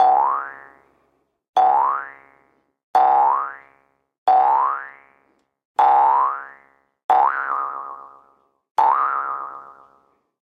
Bounce - Boing - Jump - Hit - Cartoon - Comedy - Jews - Jaw - Harp
5 normal bounces and 2 wobbly bounces in 1 sample!
I'd love to hear if you can use it!
Recorded with a Rode NTG3.
Jews, Bounce, Boing, Jaw, Variations, Hit, Comedy, Harp, Jump, Cartoon